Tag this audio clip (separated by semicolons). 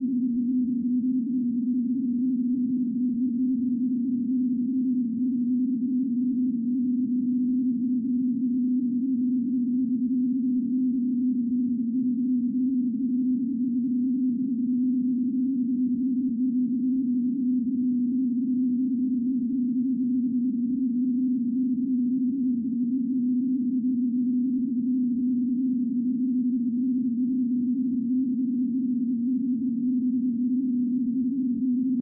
high-pitched; windy